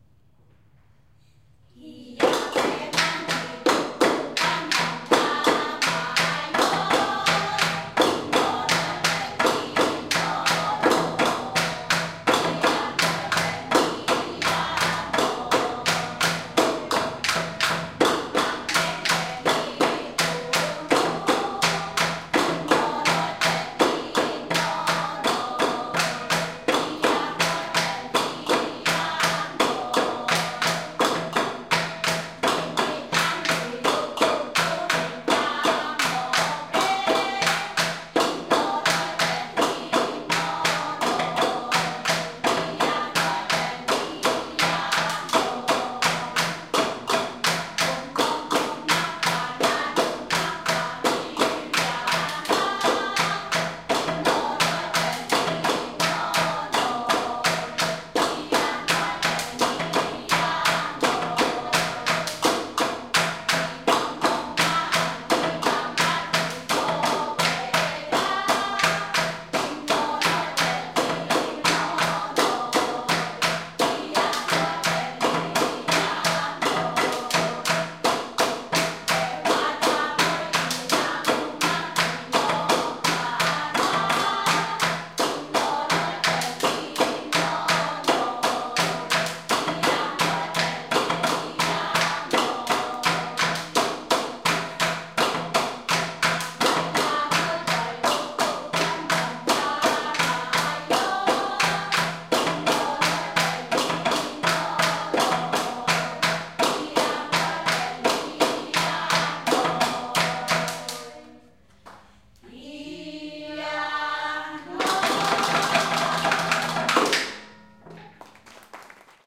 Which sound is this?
VOC 150310-0959 PH EthnicMusic
Traditional music and dance performed by native people from Banaue (Philippines).
Recorded in March 2015 in Banaue (famous place for its beautiful rice terraces in Philippines).
Recorder : Olympus LS-100 (internal microphones)